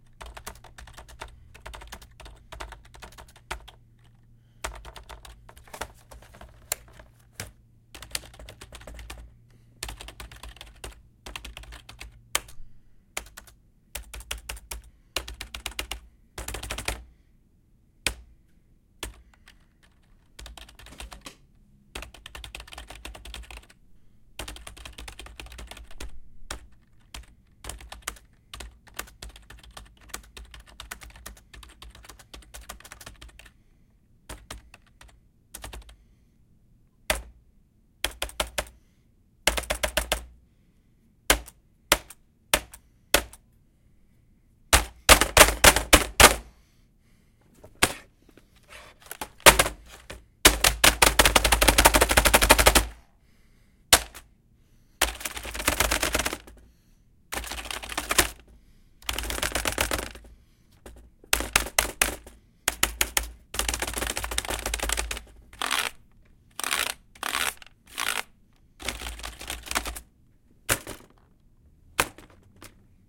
keyboard sounds
The sounds of typing on a plastic computer keyboard that becomes increasingly aggravated until the keyboard is being pounded on and smashed with fists and face, but you can't really hear the difference.
typing, keyboard, pounding, hitting, angry